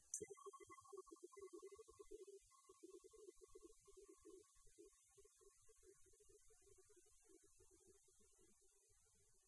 softening sound of a brazen singing bowl